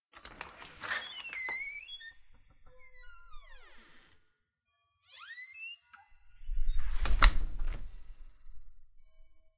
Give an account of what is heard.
Front Door open and close
A recording of my heavy front door opening and closing.
environmental-sounds-research, close, open, door